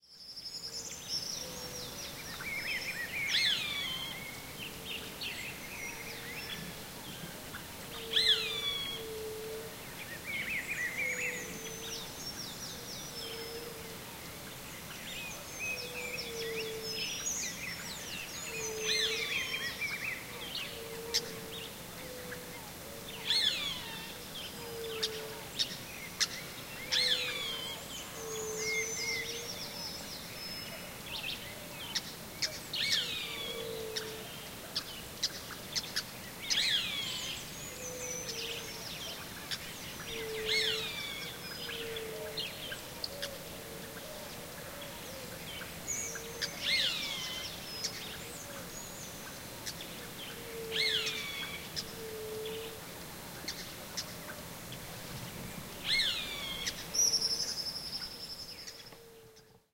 2014 10 04 Fazenda Cana Verde morning birds 4
Early morning before sunrise on a big farm, so-called fazenda, in the Sao Paulo hinterland, near Campinas, Brazil. Song and calls of birds near the residential area of the farm, by a small pond. Waterfall noise in the background.
Sao-Paulo, bird, birds, birdsong, brasil, brazil, farm, field-recording, forest, morning, nature, pond, scrub